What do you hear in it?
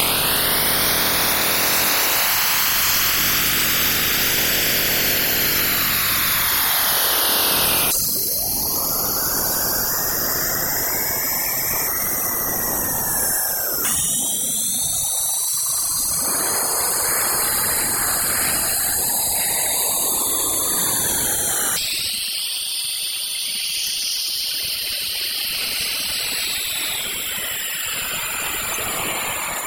RGB values are plotted as sound, usually very raw but sometimes it sings back!
sonogram, ambient, soundhack, experimental, computer